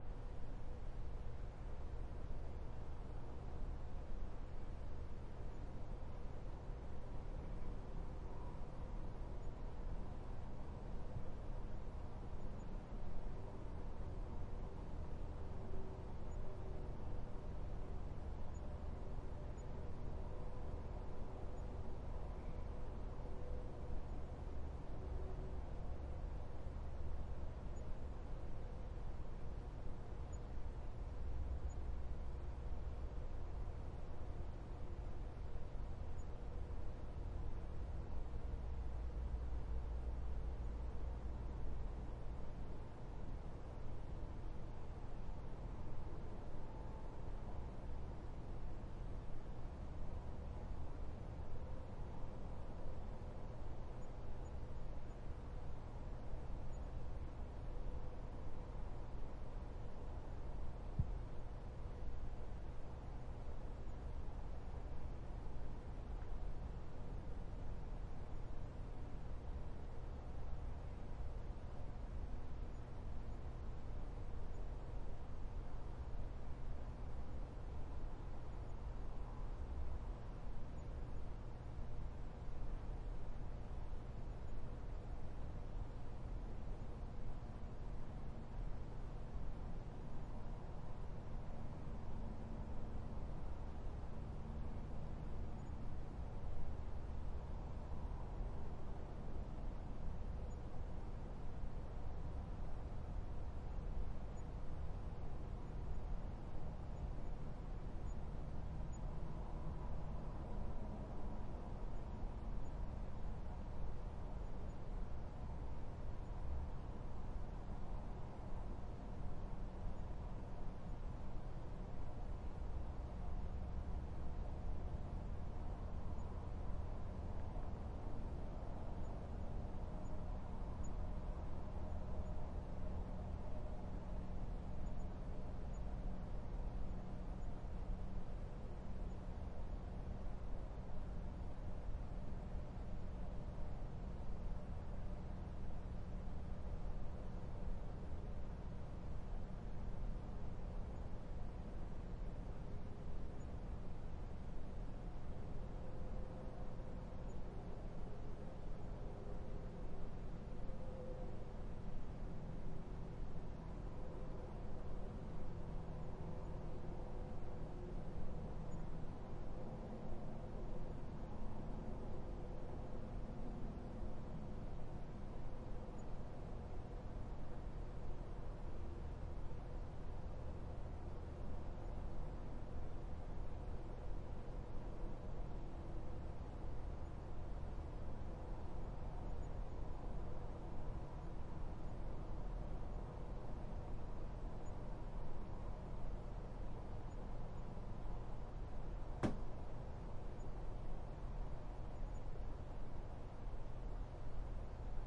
room tone small trailer bedroom night quiet with distant highway traffic
room, distant, tone